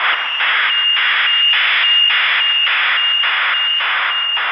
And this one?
puffing loop1
Heads and tails match for looping. Electronic pulses sound like little bursts of air followed by a ring. Slight phase and volume rise and fall - Generated with Sound Forge 7 FM Synth
effect, electronic, fm, loop, pulses, synth